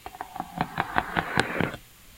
The sound of a steel ball rolling down a ramp with rubber bands spaced unequally apart.
Recorded with my phone, an LG-V30 and trimmed using Audacity.